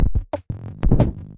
A weird glitchy, bleepy loop, made on FL studio.